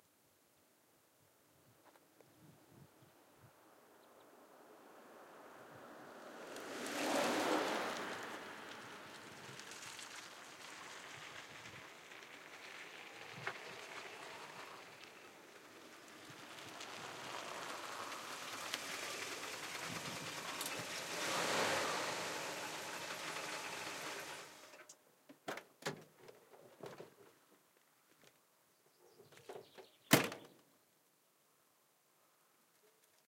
CADILLAC STOP
A Cadillac Coupe Deville 1966 comes from 70 meters, turn, stops, driver get out of the car and shut the door
Stereo VAW 44.1/16 take by 2 mics AKG SE 300 cardio on Wavelab
No normalisation, Hi Pass filter 12db/170 Khz
cadillac, car, engine, motor